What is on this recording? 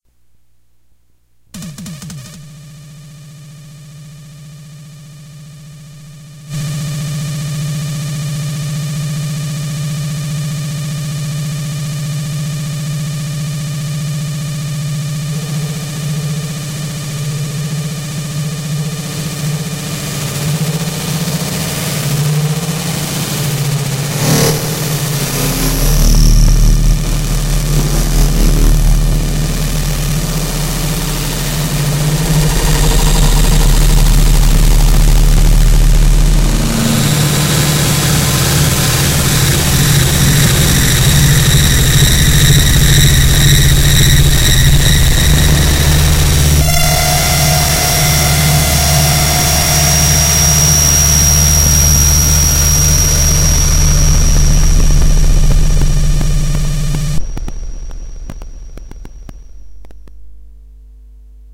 double glitch violence
Sound that was produced by cartridge-tilted gameboy advance sp and distorted by looping glitch on cheap realtek soundcard. Additional delays in puredata.
The whole variety of different noises, but in general that sounds crispy. And disturbing.
audio-violence, cartridge-tilting, game-boy, glitch, noise, puredata, wierd